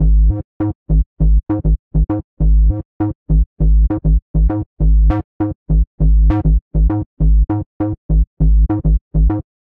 gl-electro-bass-loop-015
This loop was created using Image-Line Morphine synth plugin
bass, dance, electro, electronic, loop, synth, techno, trance